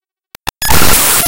Raw import of a non-audio binary file made with Audacity in Ubuntu Studio
extreme, digital, electronic, loud, file, distortion, glitch, harsh, random, raw, data, computer, glitchy, glitches, noise, binary